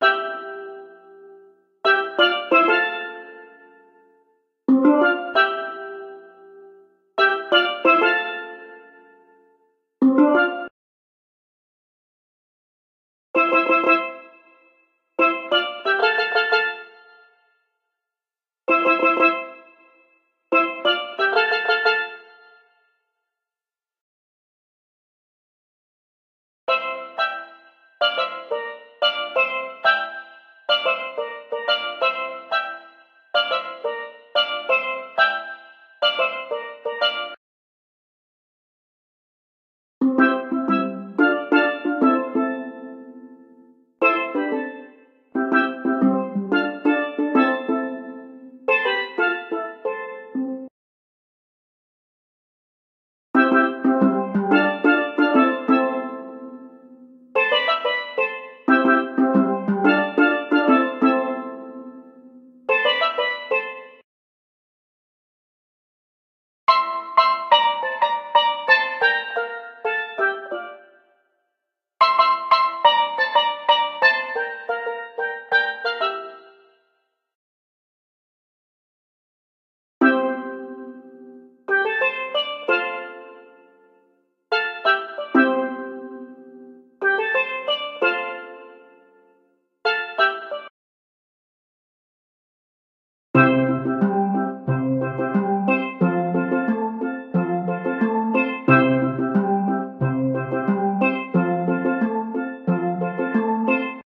steel drums 90bpm key of a
steel drum loops
drum-loop, drums, percs, percussion-loop, quantized, steel, sticks